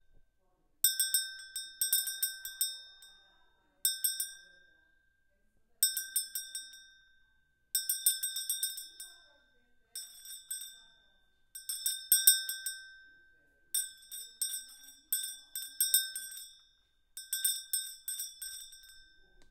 Cow Goat Bell Vaca Carneiro Sino Polaco Bells